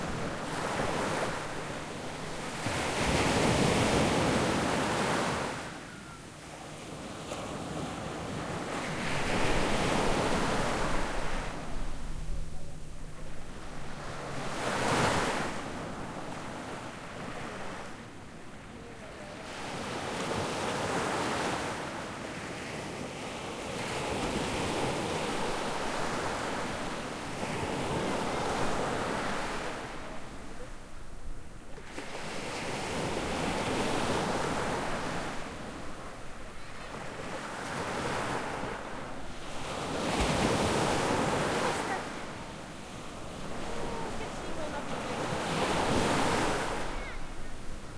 Recorded at a beach in Santa Marta, Colombia